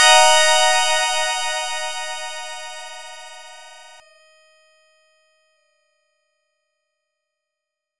Sound create use FM synthesis use C program. Use expf( -time ) envelop.
Algorithm 4 of YM2515 chip (not use chip, only follow its algorithm in C program)
• feed back = 0.0625
• use 3 oscillator group (same ampl, different freq):
GROUP 1
• ampl_m1 = 0.660*4.0
• ampl_m2 = 0.716*4.0
• ampl_c1 = 0.573*4.0
• ampl_c2 = 0.627*4.0
• freq_m1 = 1869.477 Hz
• freq_m2 = 622.528 Hz
• freq_c1 = 1245.057 Hz
• freq_c2 = 622.907 Hz
GROUP 2
• ampl_m1 = 0.660*4.0
• ampl_m2 = 0.716*4.0
• ampl_c1 = 0.573*4.0
• ampl_c2 = 0.627*4.0
• freq_m1 = 2222.630 Hz
• freq_m2 = 740.120 Hz
• freq_c1 = 1480.239 Hz
• freq_c2 = 740.498 Hz
GROUP 3
• ampl_m1 = 0.660*4.0
• ampl_m2 = 0.716*4.0
• ampl_c1 = 0.573*4.0
• ampl_c2 = 0.627*4.0
• freq_m1 = 2801.377 Hz
• freq_m2 = 933.035 Hz
• freq_c1 = 1866.071 Hz
• freq_c2 = 933.414 Hz
fm-synthesis, electric, power, energy, guitar